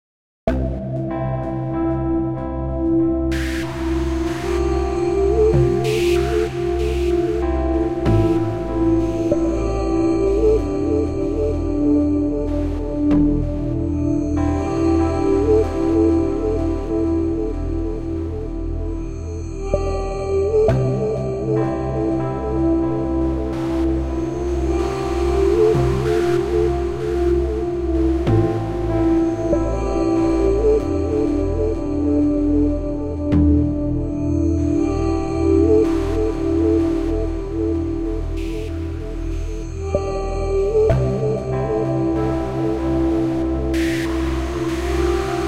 electronic music loop 002
electronic music loop
ableton and massive sounds